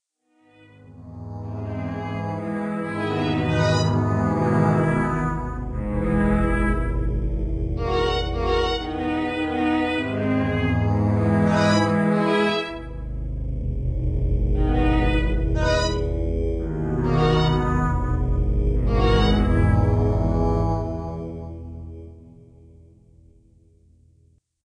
An orchestral violin track. The notes of these short compositions were picked entirely at random, but produce an intelligent and interesting, classical feel.